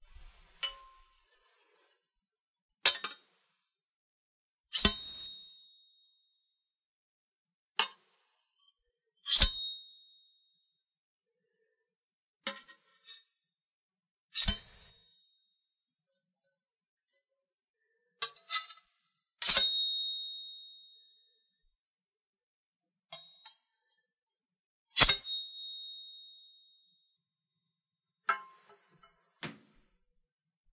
Sword Sounds 02
Scraping two kitchen knives together to get that classic "shing" sound. Some clanking sounds are also made when the knives touch together. Recorded on iPhone 6S and cleaned up in Adobe Audition.